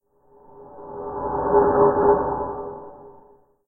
Forward and reverse spoon hitting a bowl with effects.